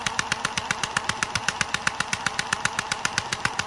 Drill Held Slow
Bang, Boom, Crash, Friction, Hit, Impact, Metal, Plastic, Smash, Steel, Tool, Tools